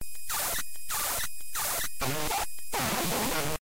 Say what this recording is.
Ghost Alien Monster Communications
I was exporting a graphic in Adobe After Effects and the export had errors and came up with this sound. Thought it was pretty cool.
Alien, creepy, effect, electric, Ghost, loud, Monster, radio, technology